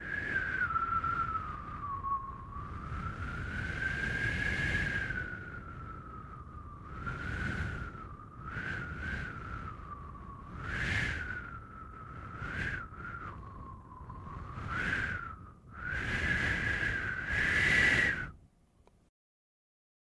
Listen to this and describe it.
Wind Arctic Storm Breeze-011
Winter is coming and so i created some cold winterbreeze sounds. It's getting cold in here!
Arctic, Breeze, Cold, Storm, Wind, Windy